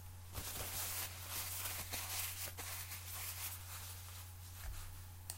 crumpling paper towel
paper towel making sounds